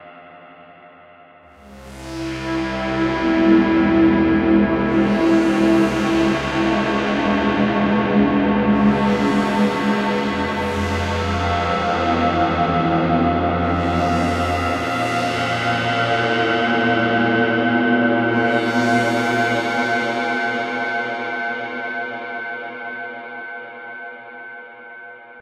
dark athmosphere fbone
Another dark soundscape.